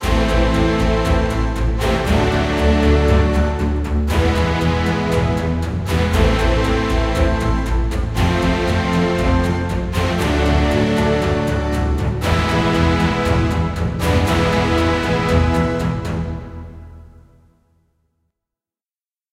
Victorian Steampunk Music 1
A small piece of music ideal for a steampunk or victorian scene, made in my DAW with some orchestral sample libraries
drama, fantasy, horses, movie, steampunk